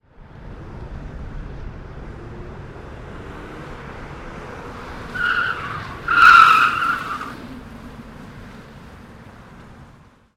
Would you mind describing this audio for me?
Nissan Maxima handbrake turn (04-25-2009)
The sound of a car doing a handbrake or e-brake turn. The car is a 3.0L V6 Nissan Maxima. Recorded with a Rode NTG2 into a Zoom H4.
car, brake, tyre, screech, speed, skid, squeal, tire